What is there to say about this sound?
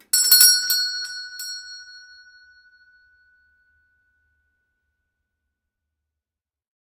FX Doorbell Pull without pull Store Bell 02
Old fashioned doorbell pulled with lever, recorded in old house from 1890
Doorbell, Pull, Store, bell